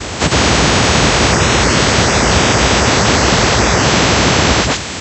high ride
Randomly generated noise.
generative, sound-design